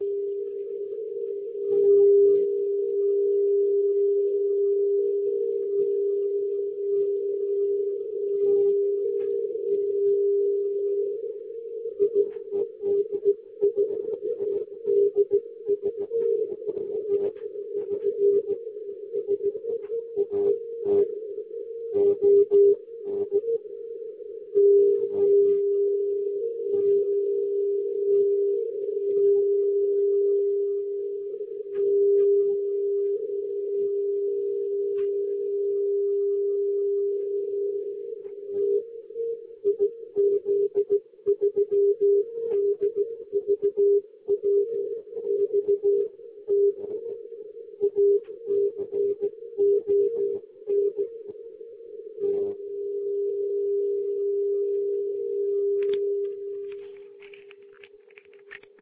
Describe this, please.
IZ3DVW-BCN-20120831-1830UTC-3577.02KHZ
Italian short-wave beacon
beacon, morse, cw